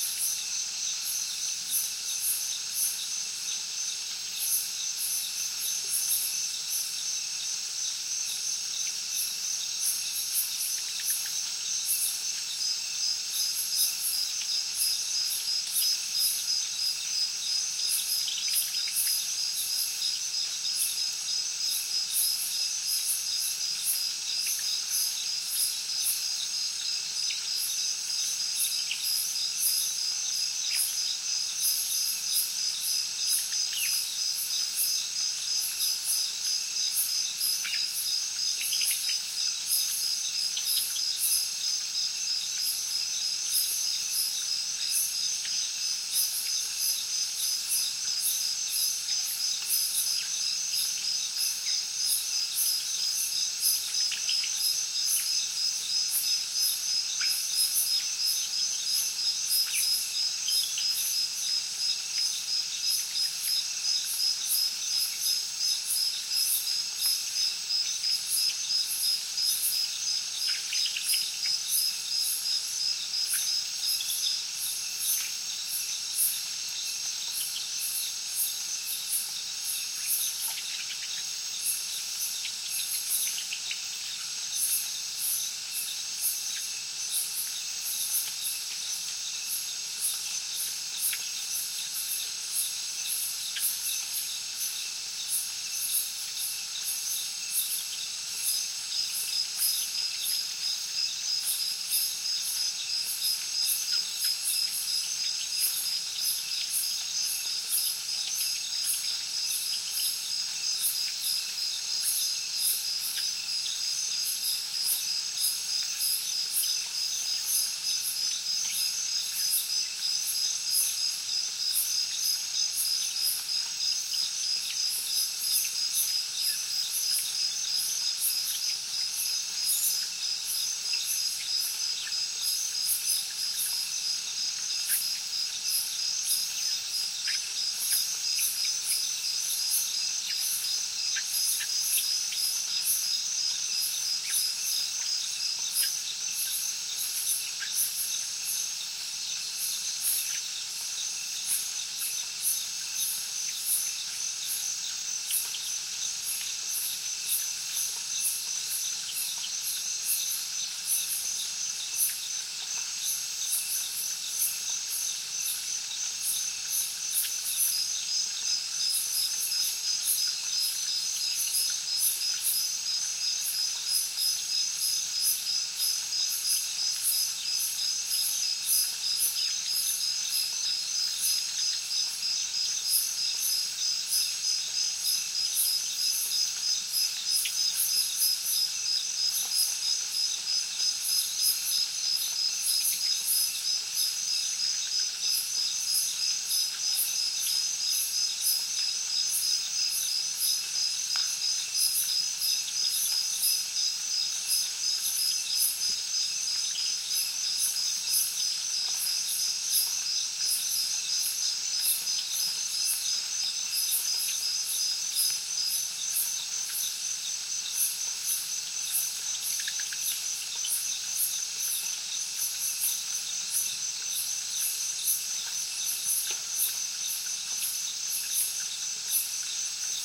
Thailand jungle night heavy crickets2 night tone
Thailand jungle night heavy crickets
night, Thailand